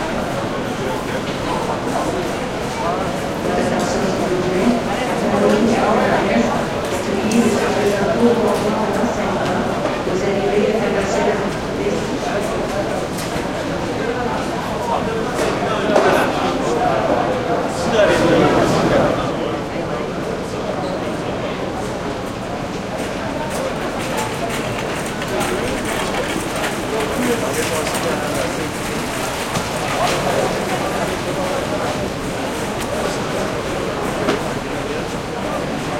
airport Dorval busy some voices quebecois +cart pushed by over tile floor
busy, airport